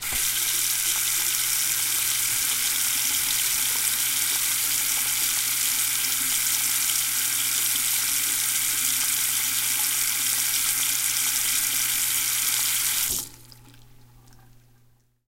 Bathroom Sink Water Running
Sound of my bathroom sink's running water.